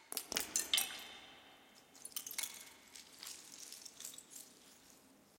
Dropped, crushed egg shells. Processed with a little reverb and delay. Very low levels!